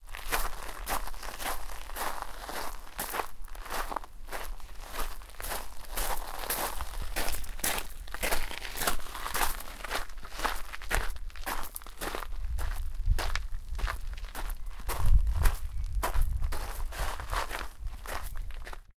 Footsteps, Stones, A
Raw audio of footsteps on a stone-filled driveway.
An example of how you might credit is by putting this in the description/credits:
The sound was recorded using a "H1 Zoom recorder" on 24th April 2016.